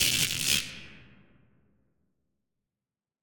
Sparky sounds made from industrial cellotape on a glass pane. Quick, to the point sparky sound for all your dysfunctional sound engineering needs.